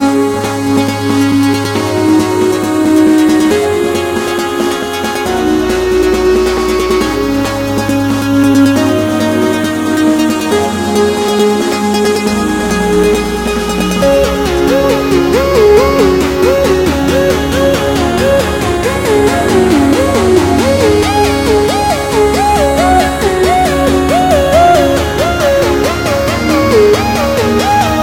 superhappycheesyloop2of2
It's the cheesy victory riff! Two cool little music loops for your super happy moment :) 137bpm. key of Csharp
video, cool, retro, alien, space, loop, happy, cheesy, music, game